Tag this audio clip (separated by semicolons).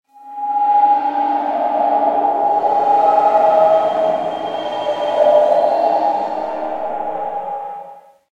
alien; atmosphere; out; space; strange; sweep; this; underground; world